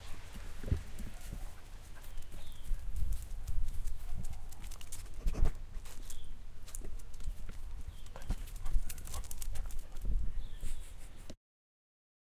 Dog paws
A short clip of a dog running on tiles and sniffing around
sniffing, sniff, Dog, claws, running